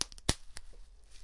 The typical sound of squeezing bubblewrap to pop the bubbles.
For this recording I was in a confined space with naked walls and placed the recorder further away from the bubblewrap so the recording picked up a bit of room sound.
bubbles, wrap, pop, plastic-wrap, dare-9, popping, bubblewrap